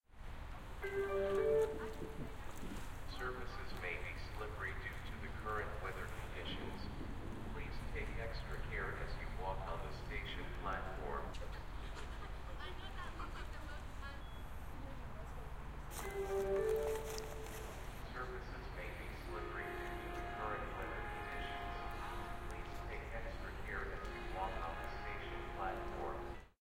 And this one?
Announcement. Station platform. Claremont, CA. January, 2019
Station platform announcement in regards to weather conditions. Claremont, CA.
trains, announcement, railroad, weather, Claremont